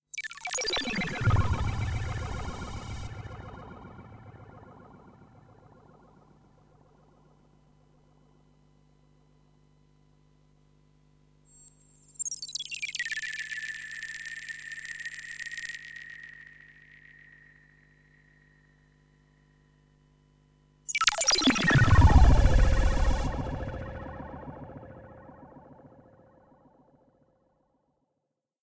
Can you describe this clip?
Roland JP8000 sound effect
A jp8000 sound effect